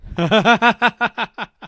Small outburst of laughter.
human, laugh, laughing, laughter, voice